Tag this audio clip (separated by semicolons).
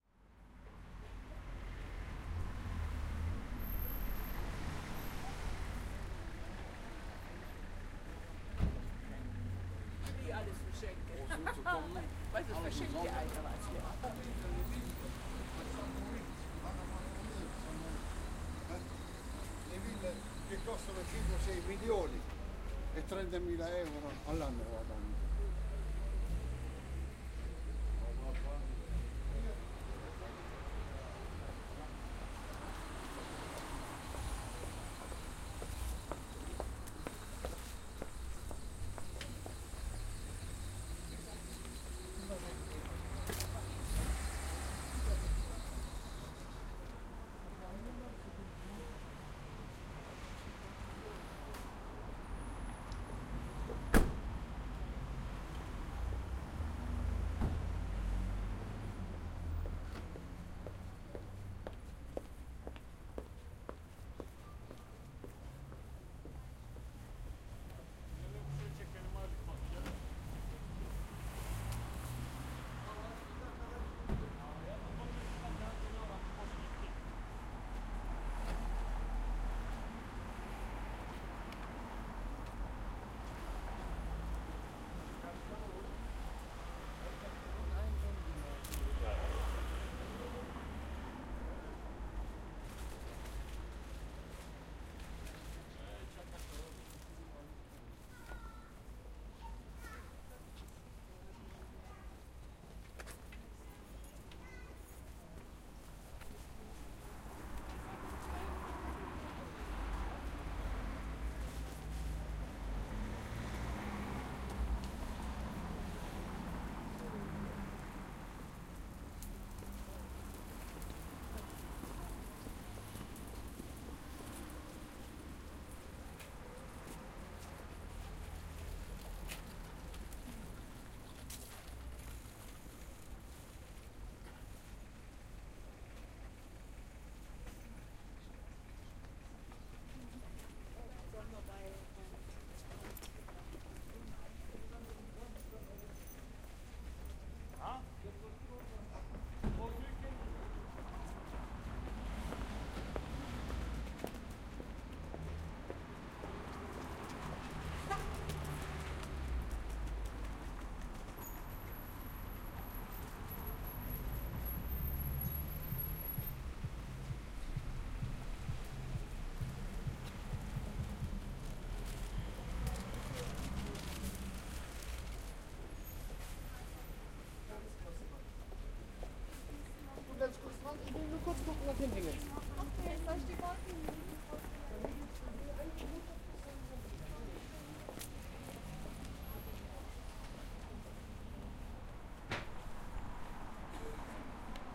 cologne; footsteps; field-recording; pedestrian; talk; cars; car; street; suburb; shopping-street; ehrenfeld